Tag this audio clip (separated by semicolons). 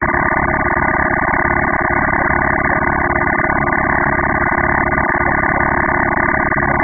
data; old; processing; retro; signal